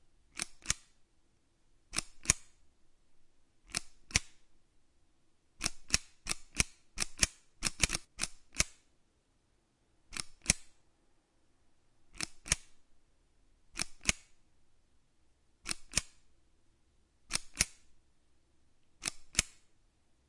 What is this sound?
The sound of a large metal cookie scoop. Perhaps could be used as a button or switch. Recorded with AT4021s into a Modified Marantz PMD661.